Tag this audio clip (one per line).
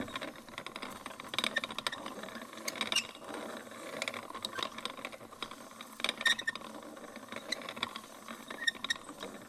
close
coffee
creak
creaky
foley
griding
grinder
loop
mechanism
rodeNTG4
rusty
small
squeak
squeaking
squeaky
turning
wheel
zoomH5